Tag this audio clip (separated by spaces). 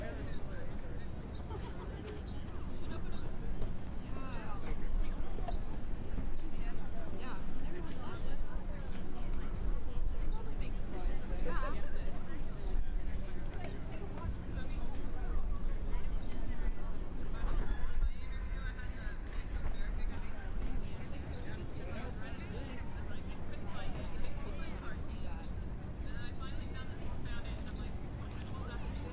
Ambiance Park City